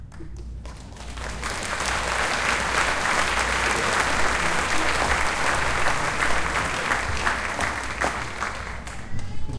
Applause Two
Recorded summer 2013 July. Applause from a summer band concert in a school auditorium. This sound has not been processed. Recorded using a Tascam DR-07 MK II. Check out the Applause pack for a few more variations. Hope you can use it if you need it and thank you for checking the sound out!
-Boot